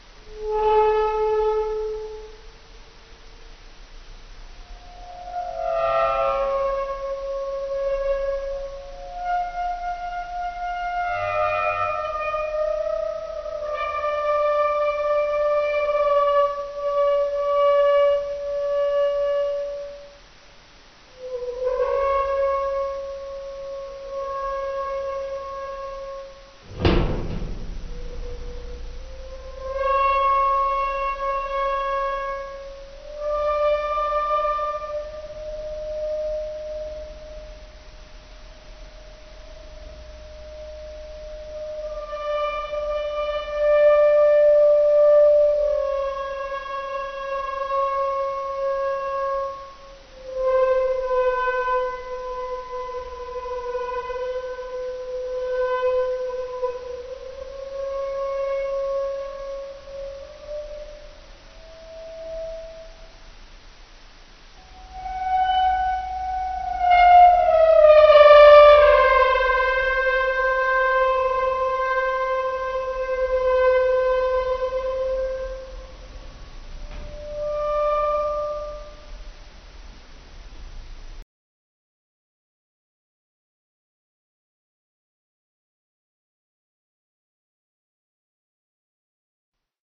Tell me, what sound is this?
Metal stretch and scrape Two
Metal stretch and scrape
scrape, industrial